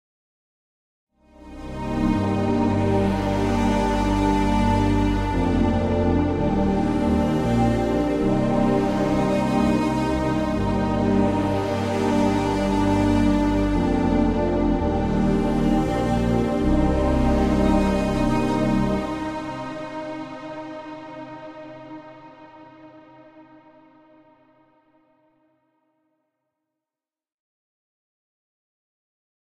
Lil 80s Vibe Pad Synth [114bpm] [G Minor]
synth, pad, synthwave, 80s, free, chords, melody, aesthetic, loop, melodic, musical